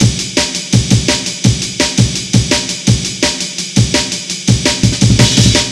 My first breakbeat! It uses the samples from the Amen Drum Kit by VEXST. 4 measures at 168bpm. Post-processed to give it a more breakbeat-ish sound.
thanks for listening to this sound, number 56750
168, amen, beat, bpm, break, breakbeat, drum, drumloop, loop, step, two